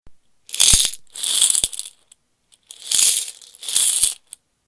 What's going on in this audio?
Money in jar
I just shook the jar of money I have for the sound
money, music152, jar